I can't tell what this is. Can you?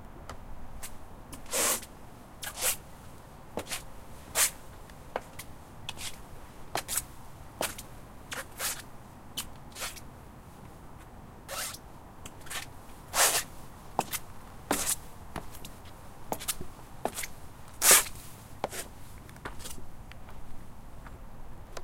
squeaky shoes
steps, foot, footstep, shoes, squeaky